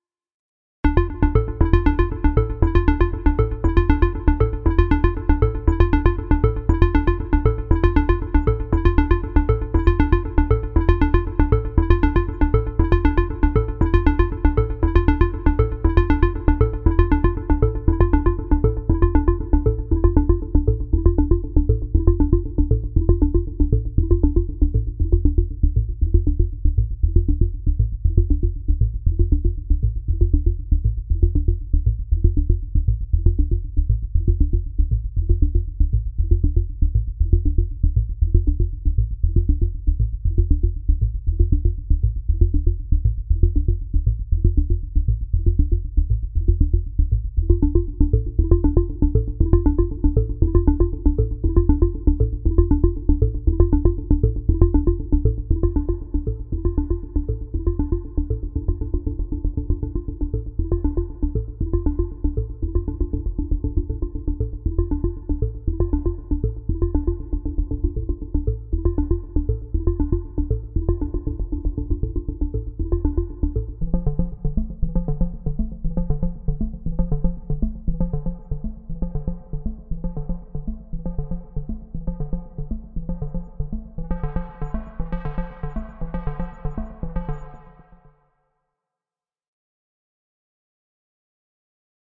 hey, this is a nice background arp that goes well over plain speech and doesn't vary, other than EQ